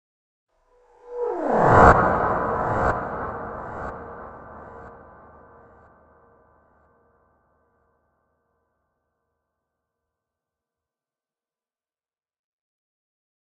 Rise effect
Este sonido se logró bajandole el pitch cierto porcentaje, ademas de agragarle una reverberación que simulara a que el sonido fue grabado en un espacio amplio, posteriormente, se invirtió completamente el sonido y se hizo un recorte al final ya que la ultima parte del sample no gustaba, y para que no hubiera picos, se le hizo un fade out.